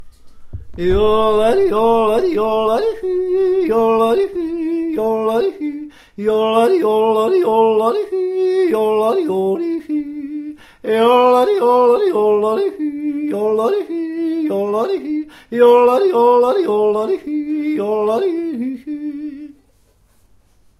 Here is a recording made in Switzerland of my father-in-law yodeling
96KHz24bit/S
Field-recording, Yodel, Astbury, Sing, Man, Switzerland, Yodelling, Yodeler, Vocalize, Singing, Music, Yodlin, Yodels